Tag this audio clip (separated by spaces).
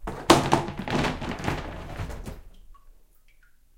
bath bath-cover close closing open opening